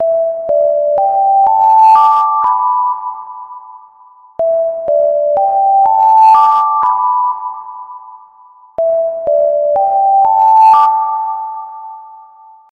TAI cay saati
When it is tea time, this melody plays at the facility where i work. Standart square wave+Reverb
digital, alarm, time